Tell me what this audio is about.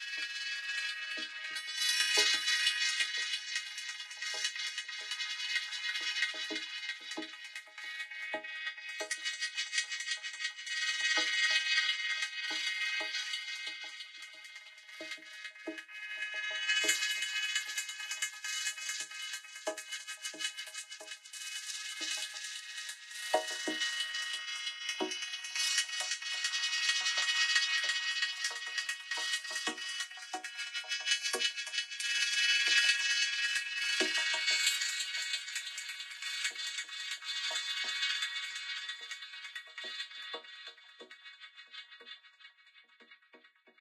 guitar and vocoder
guitar passed through a vocoder with delay
guitar, chords, vocoder